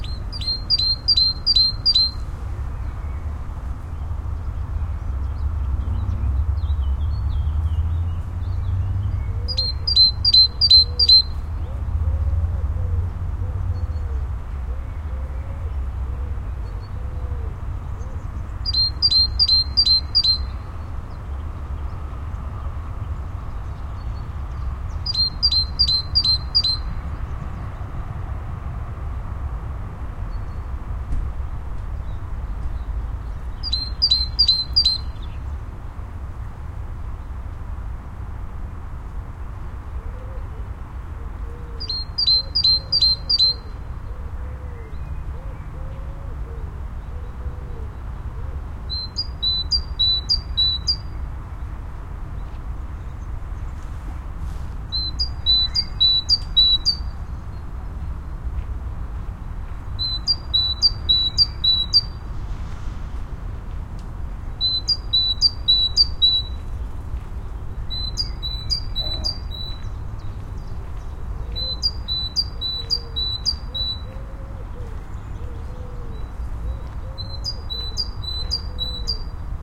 Short clip of a Great Tit with the citysounds in the background.
Sony PCM-M10 inside microphones.
field-recording, tit, tits, town